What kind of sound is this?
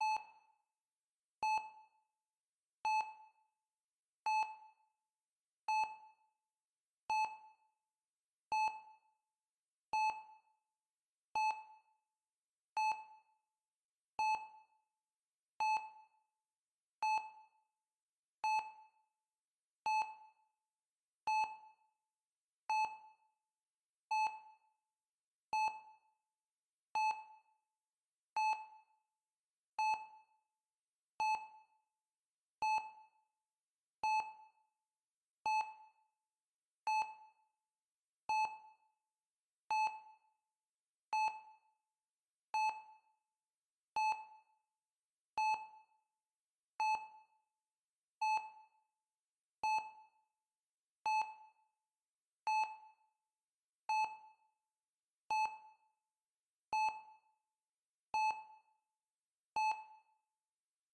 SteadyHeartRateMonitorLoop1Min
Meant to imitate a heart rate monitor for a steady, calm heartbeat. This track is loopable for your longer heart rate needs.
heart, heartbeat, heart-rate, heart-rate-monitor, hospital, loop, rate